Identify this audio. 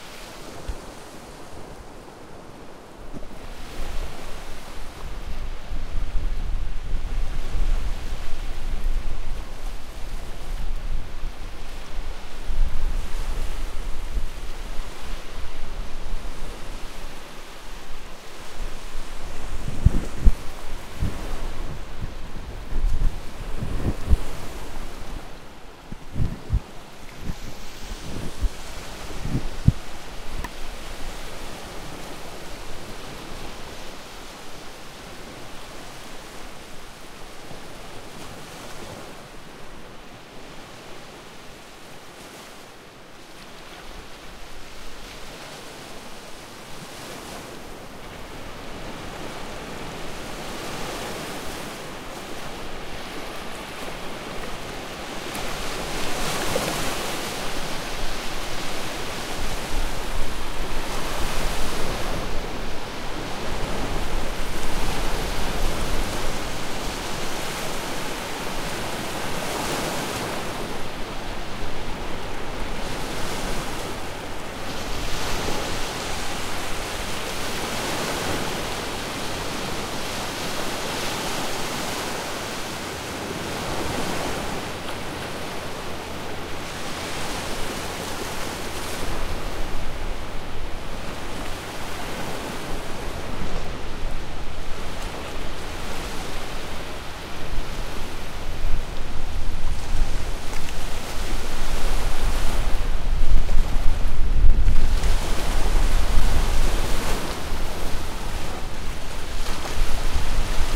pensacola beach water
Early morning ocean front at pensacola florida.
water, florida, pensacola-florida, ocean, beach